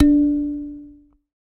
a sanza (or kalimba) multisampled